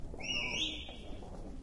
a powerful whistling. R09, internal mics